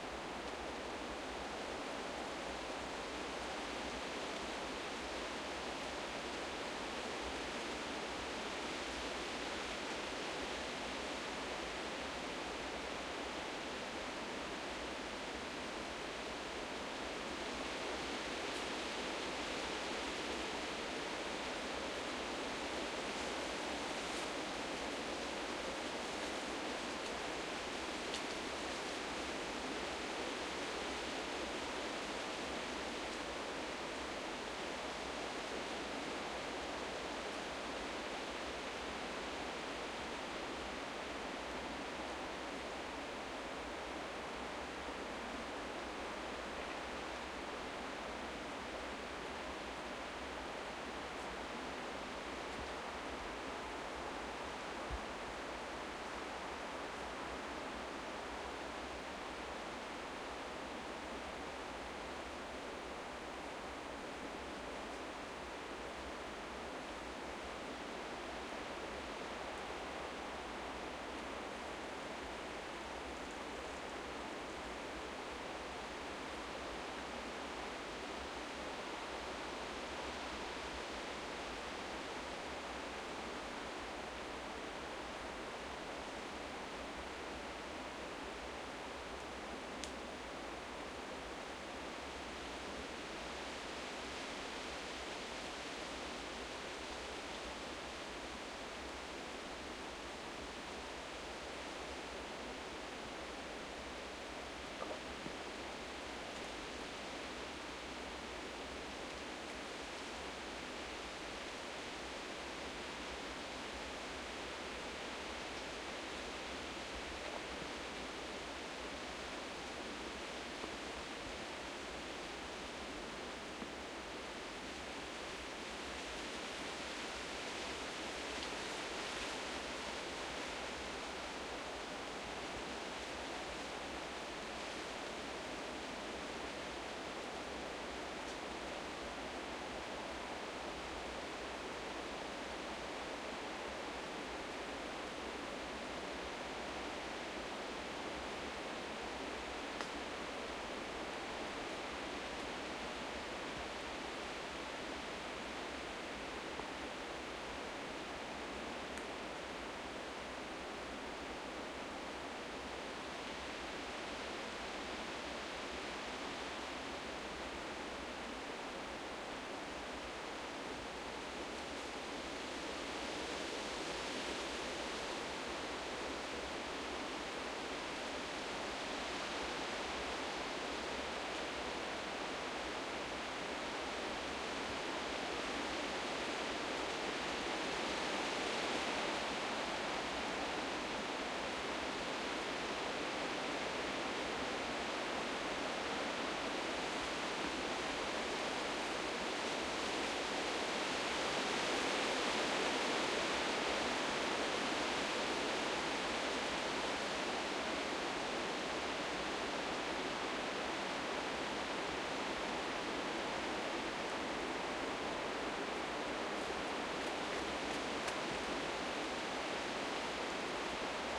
wind forest through trees around mic in waves cool movement
recorded with Sony PCM-D50, Tascam DAP1 DAT with AT835 stereo mic, or Zoom H2
around, cool, forest, mic, movement, through, trees, waves, wind